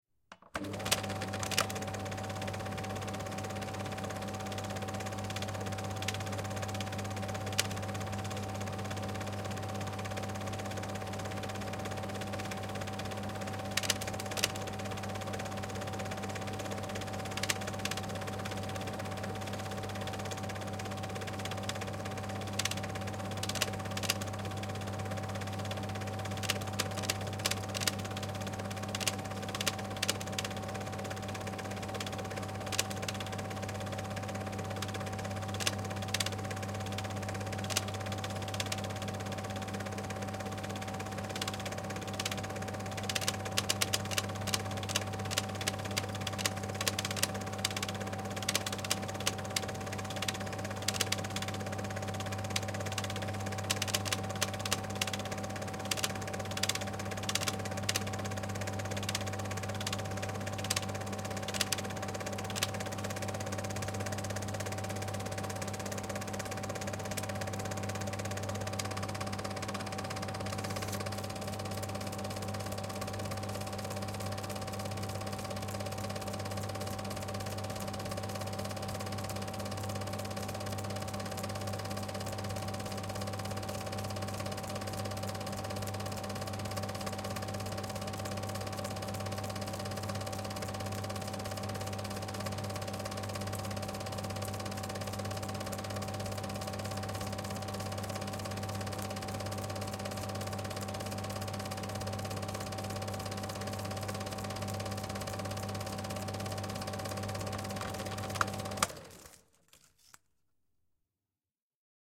Eumig MARK501 8mm Film Projector
After the projector is switched on, it runs for a while until the film rolls off the reel and then it is switched off again. The projector has a rattling sound from time to time and the motor is also clearly audible.
Recorded with: Røde NTG3B and AKG C480 in XY formation (approximately 17 cm apart). This sound is completely unprocessed.
film, projector, rattle, 8mm, rattling, motor, machine